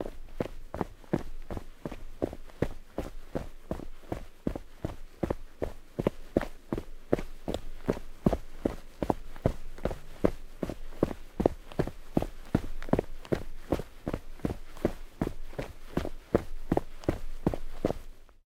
Footsteps Mountain Boots Rock Run Sequence Mono
Footsteps Run on Rock - Mountain Boots.
Gear : Rode NTG4+